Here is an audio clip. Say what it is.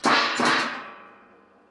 This is one of those kitchen trash cans with a lid that pops open when you step on it.